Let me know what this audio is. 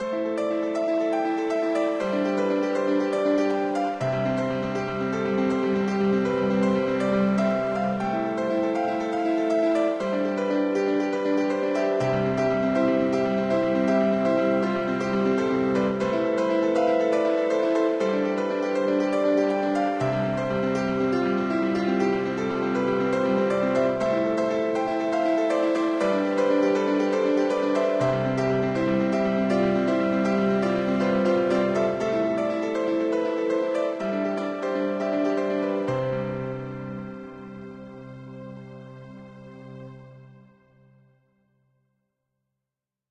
freestyle piano
Just me playing piano. If you want to hear more of my music, go here: Symphonic Chronicles
piano, pretty, freestyle